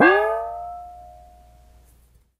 canpop6fastattackIR
Pringle can recorded from inside and out for use as percussion and some sounds usable as impulse responses to give you that inside the pringle can sound that all the kids are doing these days.
can, impulse, percussion, response